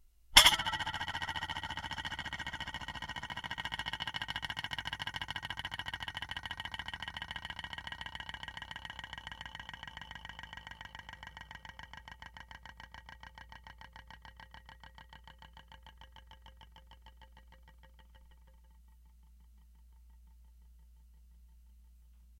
spoon bowl1
A spoon set in a bowl, vibrating/oscillating back and forth because of the shape of the spoon. Recorded with a Cold Gold contact mic into a Zoom H4.
home, contact, metallic, abstract, spoon, bowl, vibrate